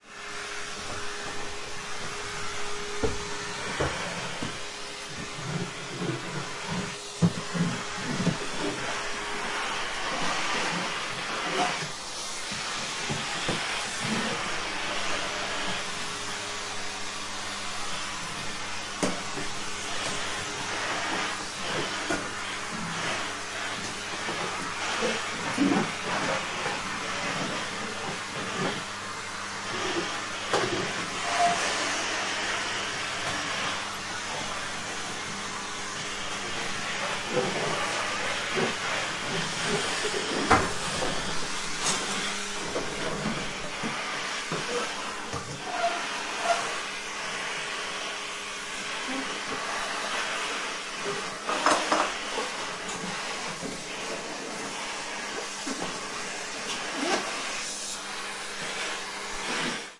Cleaning room with vacuum cleaner.